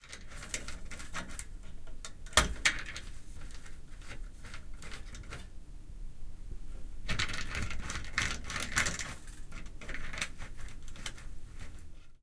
Window Blinds Open Close
Window blind opening and closing.